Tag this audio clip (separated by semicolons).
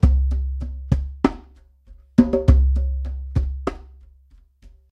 American; Djembe; Loop; trance; tribal